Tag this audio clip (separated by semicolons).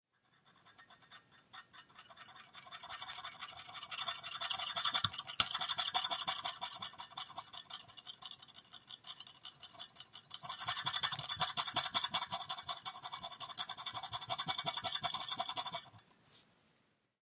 blood; knife; pain; atmosphere; murder; horror